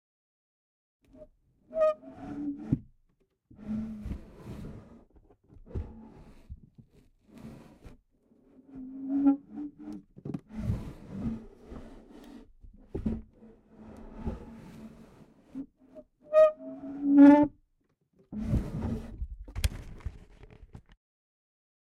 Squeaky drawer
squeek, drawer, squeak, open, wooden, Squeaky, wood, close, opening